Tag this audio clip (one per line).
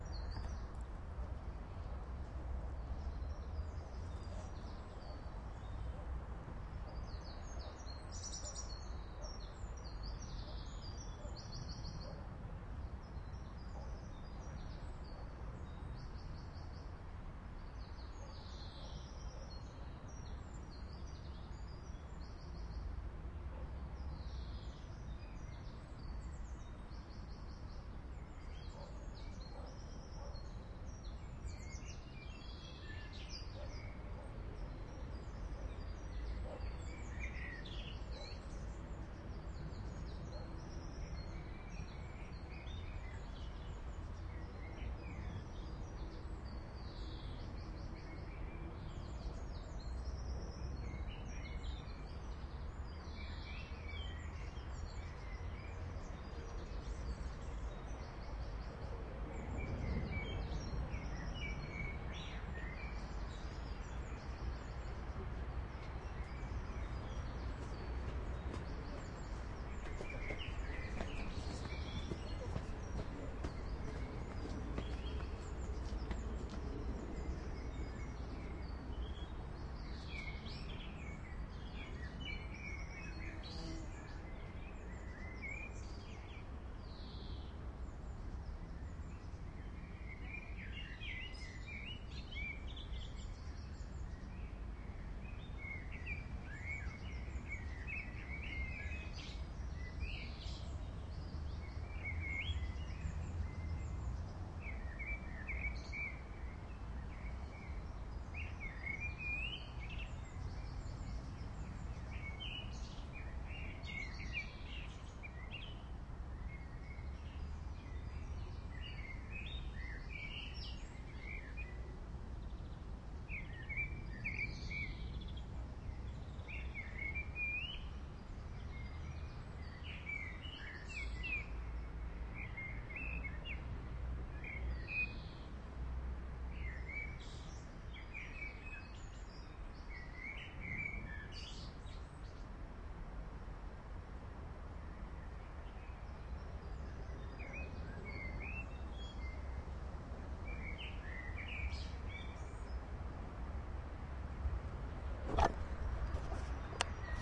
berlin
birds
city
germany
graveyard
h2
plane
sun
zoom
zoom-h2